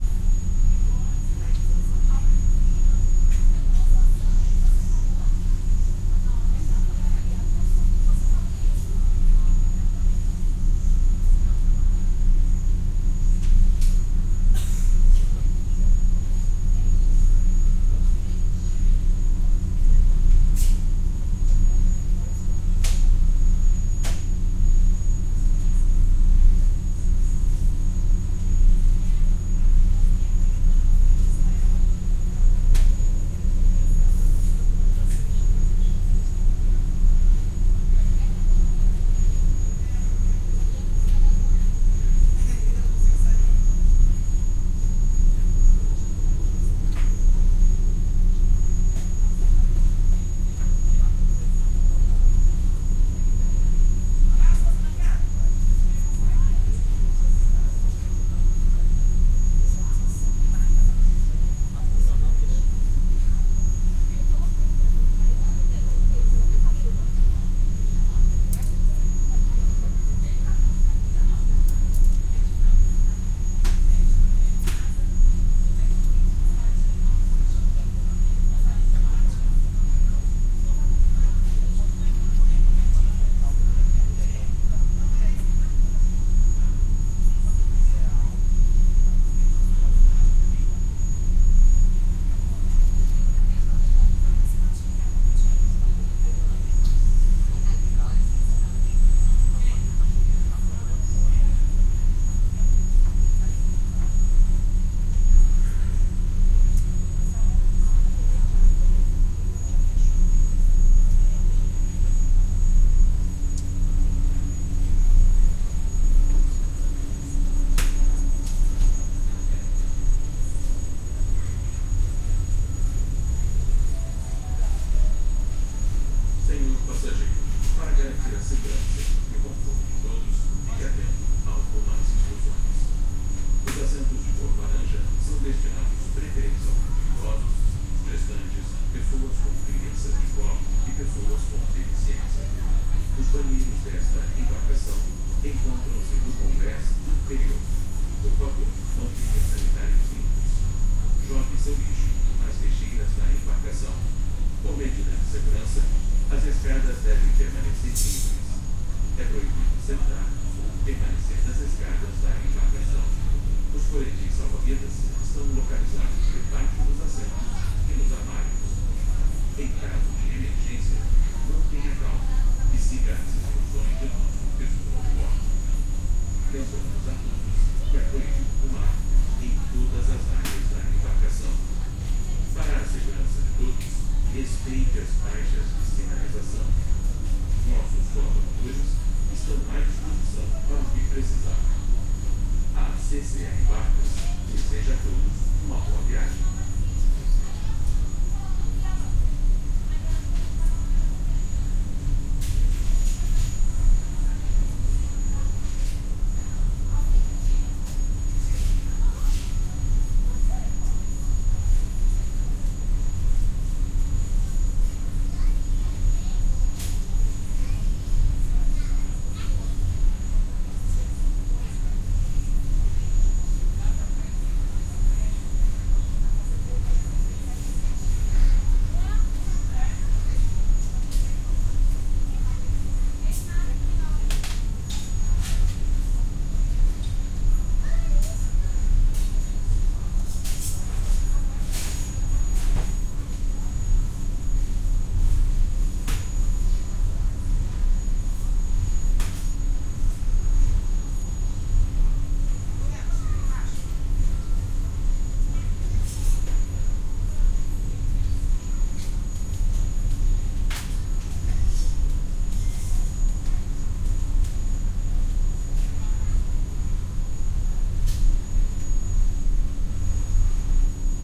Interior of ferry boat shuttling between Niteroi and Rio de Janeiro, Brazil. Running engine, announcement, small crowd of people. Recorded with DIY binaural glasses and Nagra Ares-P.